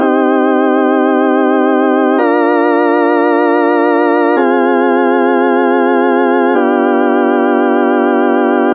Part of the Beta loopset, a set of complementary synth loops. It is in the key of C minor, following the chord progression Cm Bb Fm G7. It is four bars long at 110bpm. It is normalized.